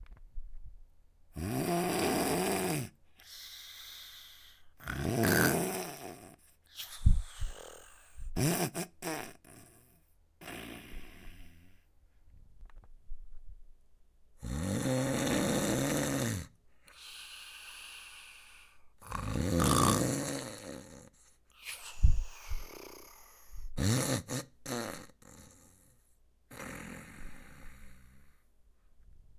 Exaggerated snoring sleeping sound. Recorded with H4 on board microphone.
silly, human, voice